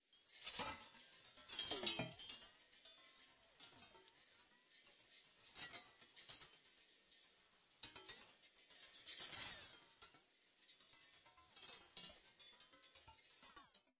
HANGERS1 Ringmod
Clink of wire hangers - ring modulator effect added
metal, foley, pot, clink